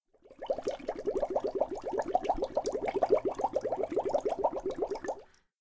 Bubbles blown using a straw in a metal bowl half filled with water. The bowl creates a ringing tone in the background as water sloshes around inside of it. A Sony PCM-D50 linear recorder was held at the lip of the bowl to capture the sounds.